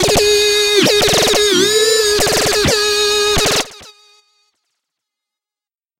THE REAL VIRUS 13. - GUNLEAD G#5
A lead sound with some heavy gun fire effect. All done on my Virus TI. Sequencing done within Cubase 5, audio editing within Wavelab 6.
gun, lead, multisample